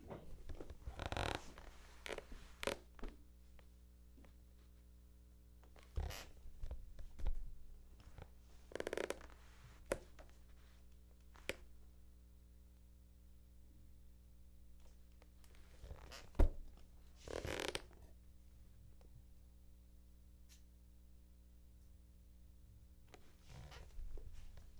Sitting on chair
chair, sitting, squeaky